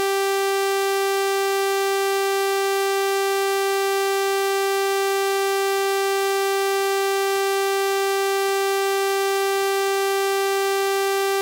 Doepfer A-110-1 VCO Saw - G4
Sample of the Doepfer A-110-1 sawtooth output.
Captured using a RME Babyface and Cubase.
falling-slope, VCO, modular, analog, slope, sample, analogue, saw, oscillator, wave, A-100, synthesizer, waveform, negative, Eurorack, sawtooth, raw, multi-sample, electronic